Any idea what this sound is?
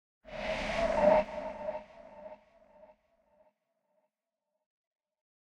electronic synth made with Massive by Voodoom Production